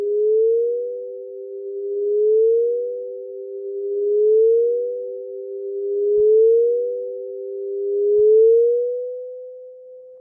I made a sort of ringing sample in Adobe Audition, then applied an echo effect to it.